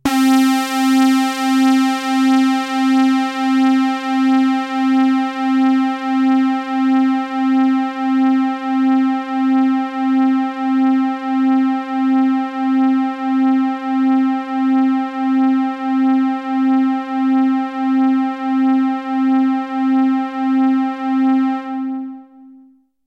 Mopho Dave Smith Instruments Basic Wave Sample - OSCDET C4